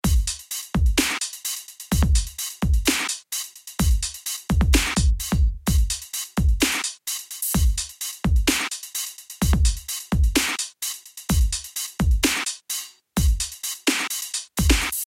Drums Electronic Loop 128 bpm
IDM ambient experimental drums loop. 128 bpm
w3ird0-d4pth
sticks, percs, 130-bpm, drum-loop